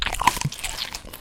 Apple bite recorded on a zoom H4N and pitched down 2 semitones. Created using adobe audition